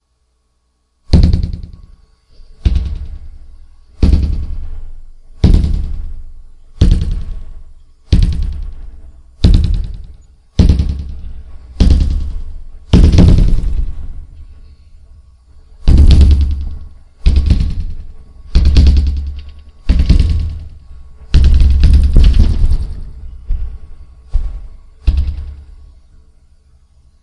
Hitting a large piece of plywood, recorded with a very cheap and low-quality computer mic. Processed in Audacity for echo and added bass.